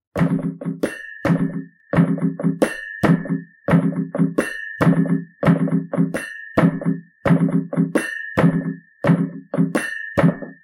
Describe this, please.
Ethnic Drum Loop - 8
Hand drum loop.
ethnic, hand, loop